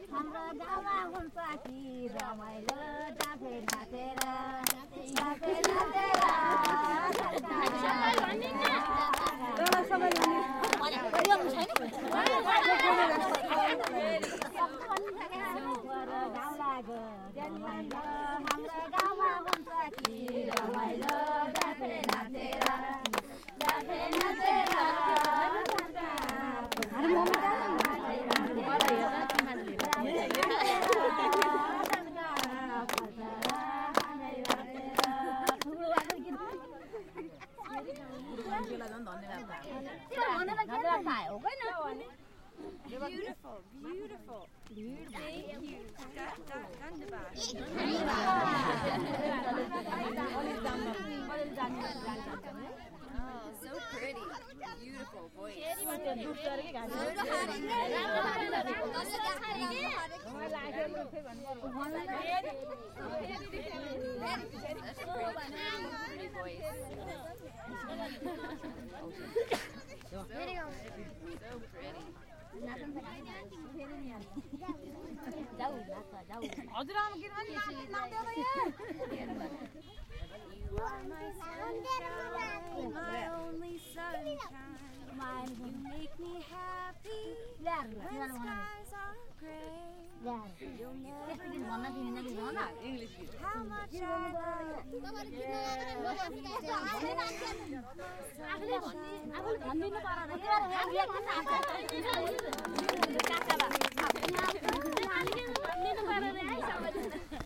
Nepali Village Song
A group of Nepalis singing to my wife.